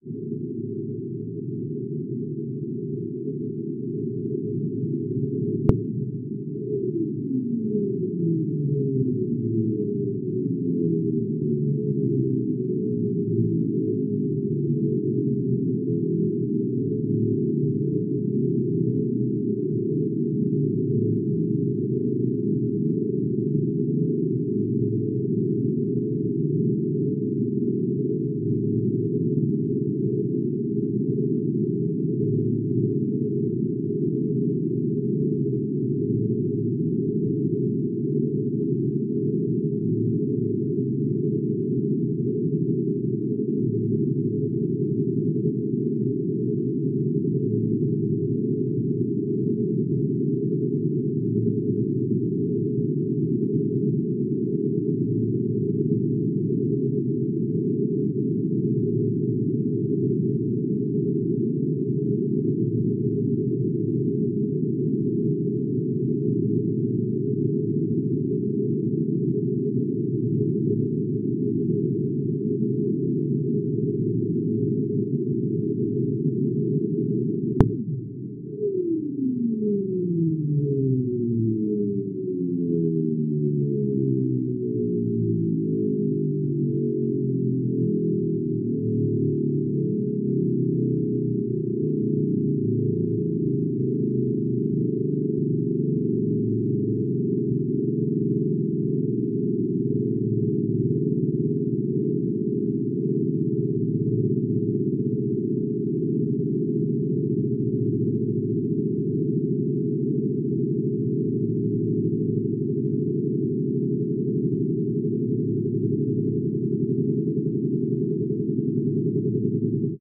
Even more melodic patterns loops and elements.
image, sound, space, synth